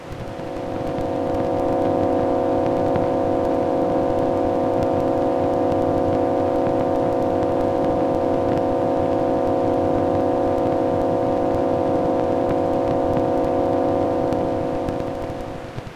Filtered humming of my computer (whiff vinyl).